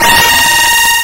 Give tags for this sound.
no-access menu error login fail game problem password incorrect

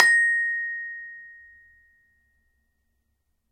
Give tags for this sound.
campanelli multisample one-shot sample single-note

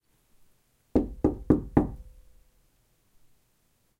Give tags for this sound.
movement sound-design dreamlike door transient wooden-door foley compact struck percussive knock hands mic microphone field-recording

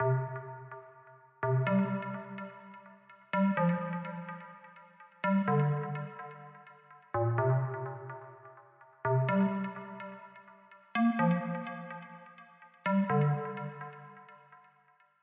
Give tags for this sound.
Electric-Dance-Music Electro Loop Stab

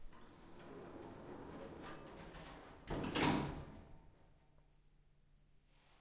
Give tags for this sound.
Door Elevator Engine Mechanical UPFCS12

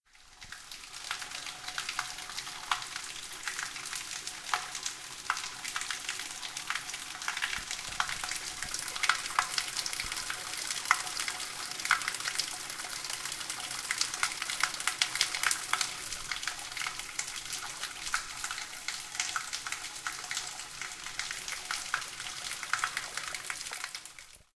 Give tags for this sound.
acuaticofonos fisics aquaticophones aquaticofons campus-gutenberg water-sounds

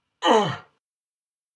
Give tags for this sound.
game yell damage ouch oof pain male grunt

